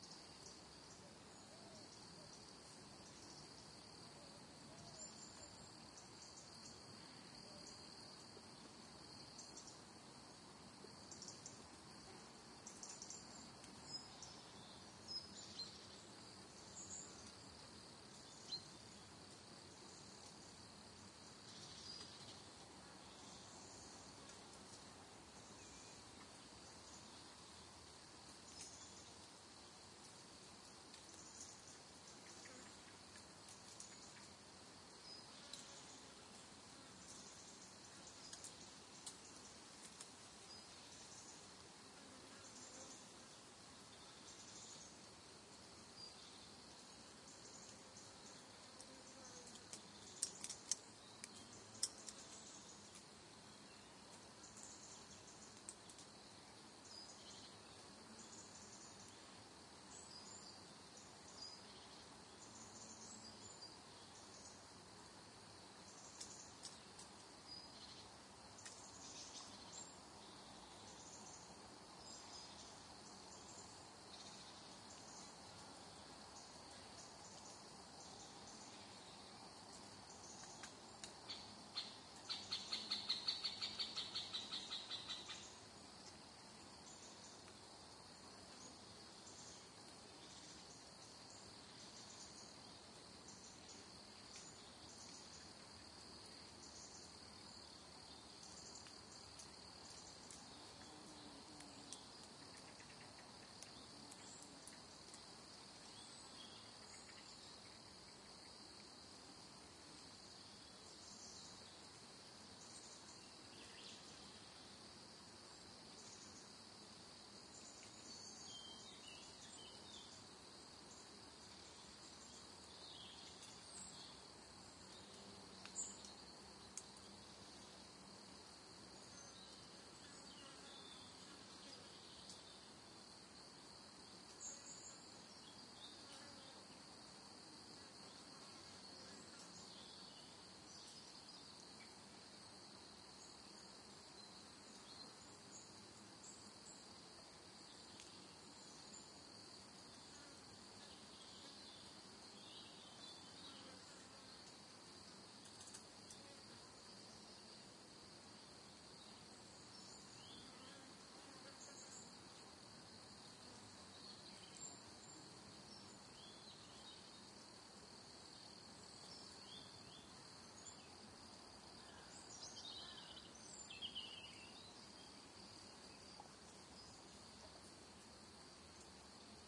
Lots of bugs flying around, crickets in the background, bird calls, wind and leaves crackling as they fall.
Microphones: DPA 4060 (Stereo Pair)
Field-Recording, Buzzing, Bugs, Bruere-Allichamps, Cher, Birds, Countryside, Atmosphere, France